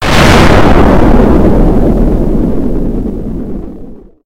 volcano eruption
White noise, manipulated until it sounds like a big volcano erupting